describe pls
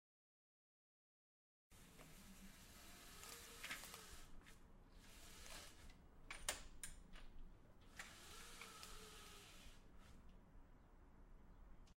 Raising Blinds - This is the sound of someone raising window blinds.